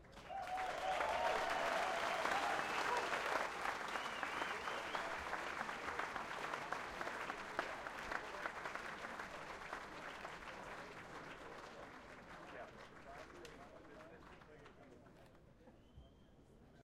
090402 01 frankfurt people aplause
aplause after small concert
aplause, frankfurt, people